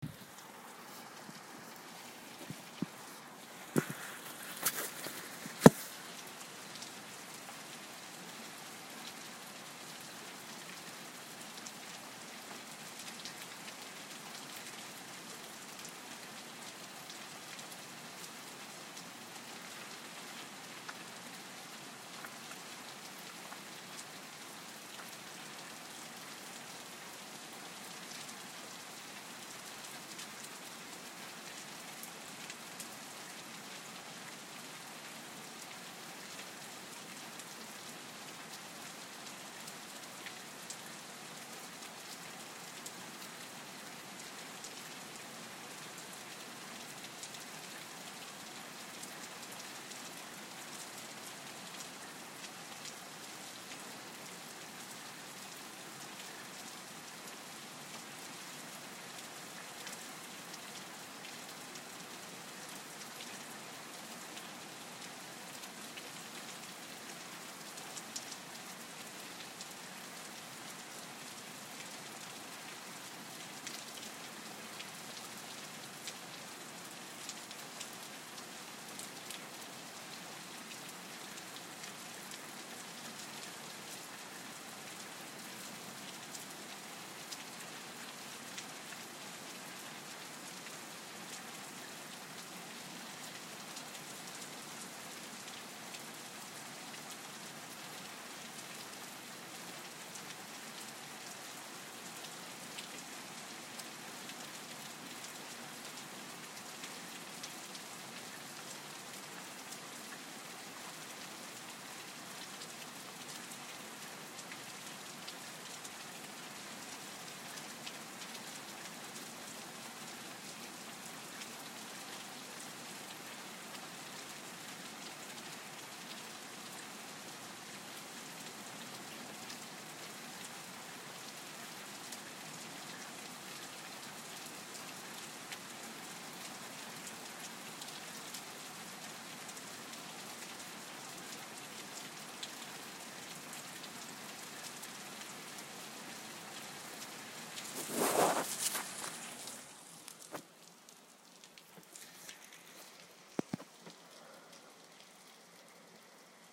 Just some rain sounds I recorded from my front window. Do whatever you want with it.